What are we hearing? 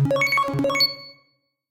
Just some more synthesised bleeps and beeps by me.